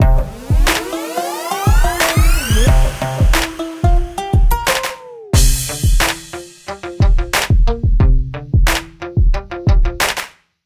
country song009
country, hip-hop, dubstep, synthesizer, loop